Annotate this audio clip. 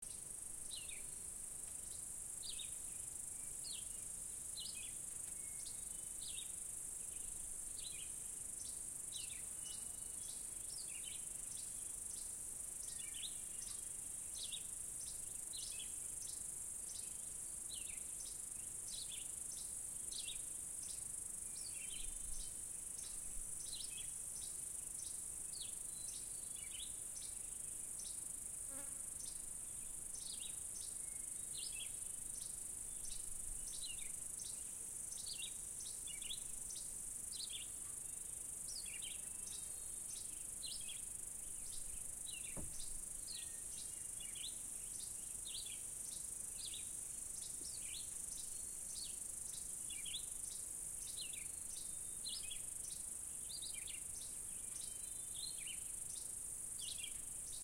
Atikokan Sounds Nature2 July2018
Recordings from abandoned iron mine
nature, insects, summer, field-recording, birds, forest